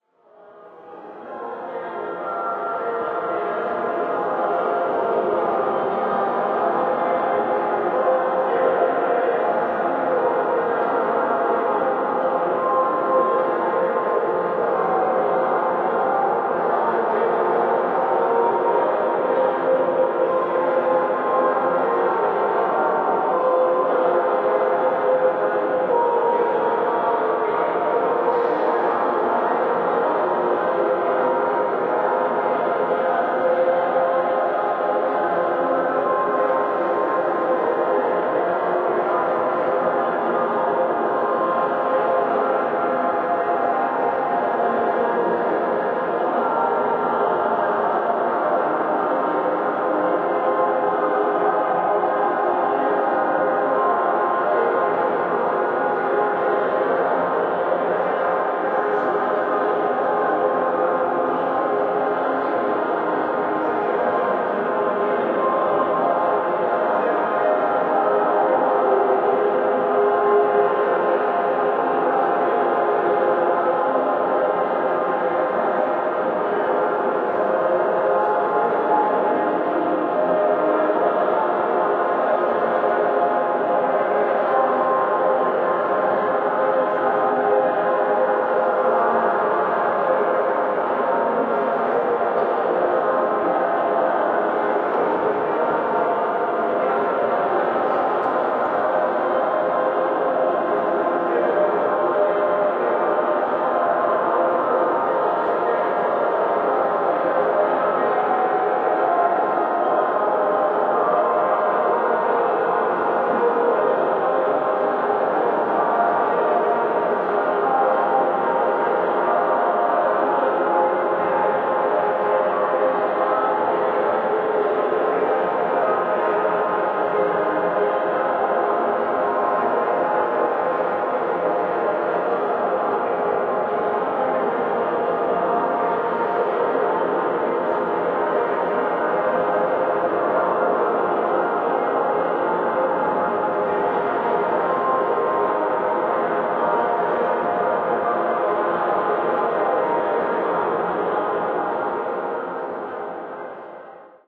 prayes in Fm
I made This with Melodyne and some modulated reverbs.
Buddhists, minor, PitchCorrect